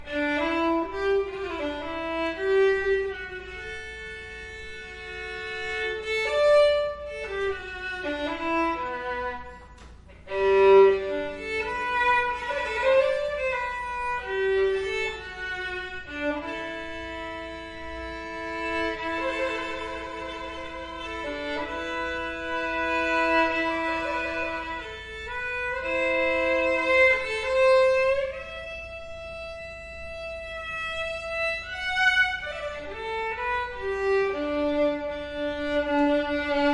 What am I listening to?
violin improv
This is a recording on an old italian violin of me improvising, exploring dynamic range, free rhythms, tonal variation, and glissandi.
It was recorded in my living room with a Zoom H4n recorder. I added a small amount of reverberation using Audacity.